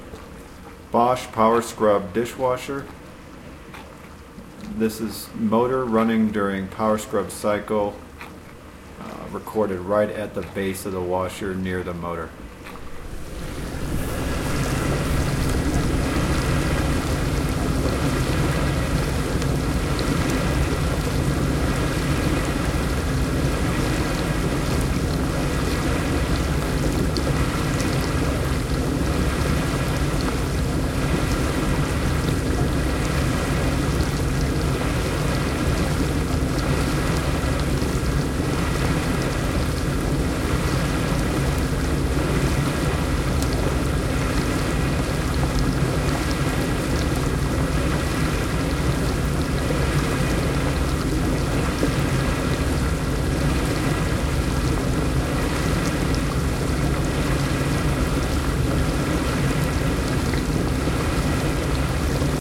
Bosch Dishwasher Motor - Base of Machine Close
Bosch dishwasher sound close with loud motor sound. Recorded with Zoom H4n built in mics.
field-recording, electric-motor, Bosch